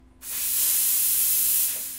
expresso vapor corto
coffee, espresso, hot